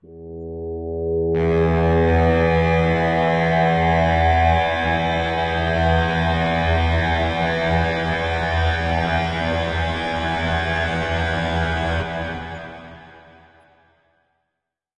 THE REAL VIRUS 07 - GIGANTIC - E3
Big full pad sound. Nice evolution within the sound. All done on my Virus TI. Sequencing done within Cubase 5, audio editing within Wavelab 6.
pad, multisample